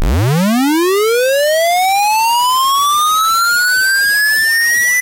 Sweep 20-2000Hz